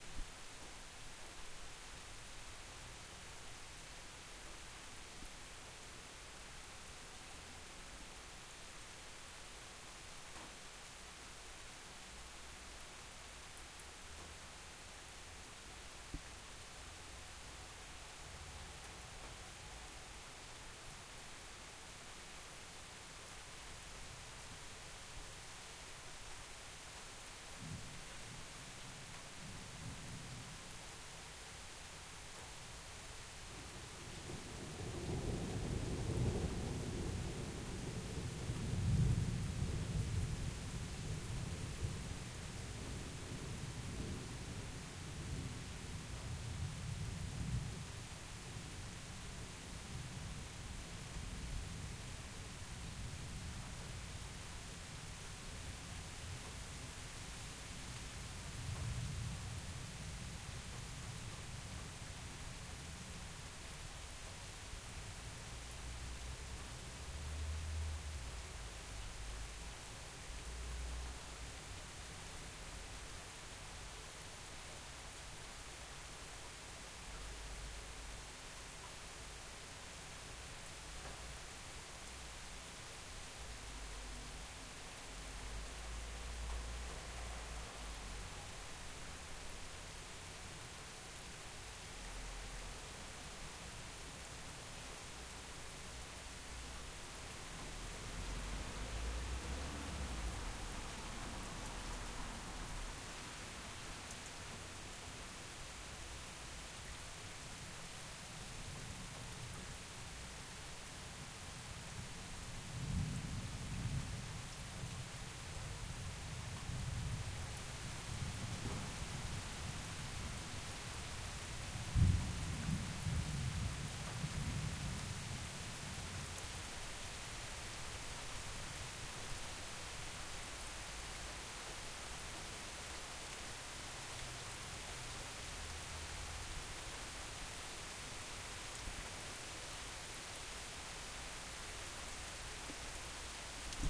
Summerstorm Munich5 LR
Awaken by a summerstorm at 5 in the morning -- I used the opportunity to record some nice rain with thunders in the back...Hope it is useful. Adapted the controllers sometimes in beetween - so listen carefully and don't be surprised by some volumeshifts...